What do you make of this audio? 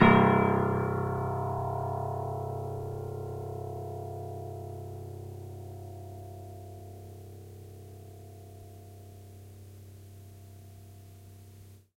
Tape Piano 1
Lo-fi tape samples at your disposal.
Jordan-Mills, collab-2, lo-fi, lofi, mojomills, piano, tape, vintage